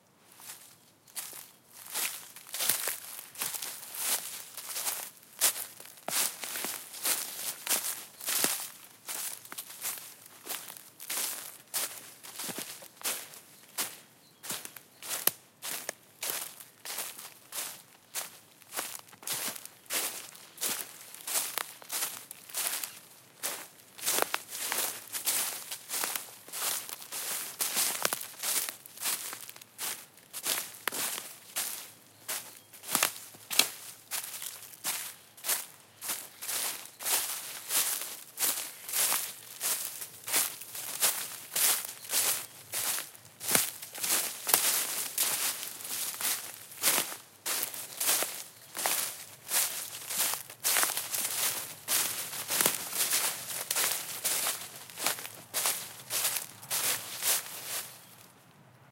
Woodland Walk Through Leaves
Woodland walk through dried leaves across the path
wood, scrunch, walk, leaves, twigs, footsteps